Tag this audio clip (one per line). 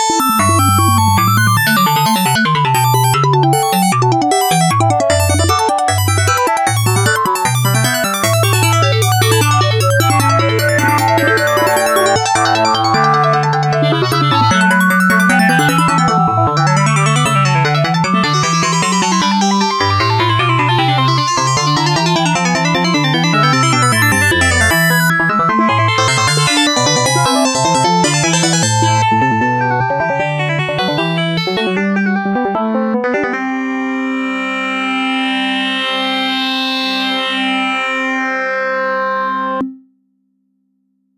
4voice
Arp2600
Bach
Baroque
fold
Fugue
Gmajor
intellijel
Modular